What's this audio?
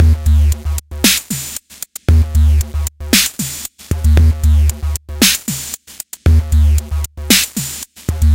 TR-606 (Modified) - Series 2 - Beat 05
Beats recorded from my modified Roland TR-606 analog drummachine
Analog, Beats, Circuit-Bend, Drum, Electronic, TR-606